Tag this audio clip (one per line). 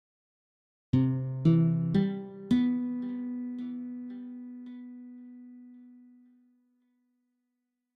clean-guitar
sad
delay